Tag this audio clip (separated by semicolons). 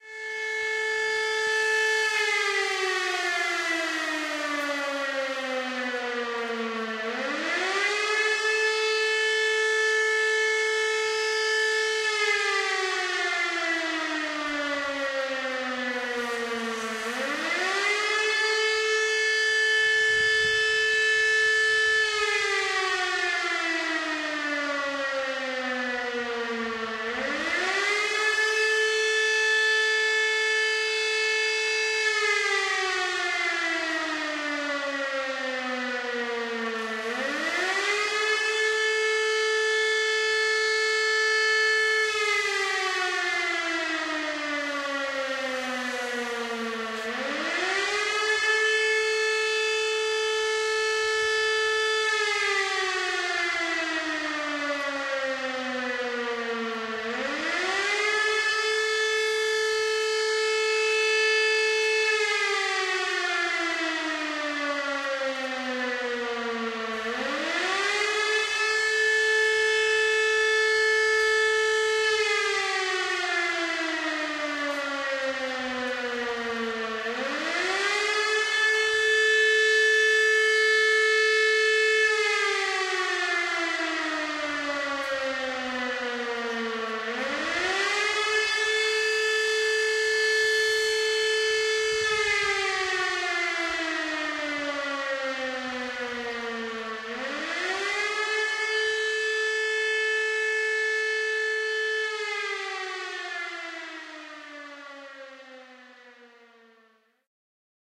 atmophere; recording; window; field; syrens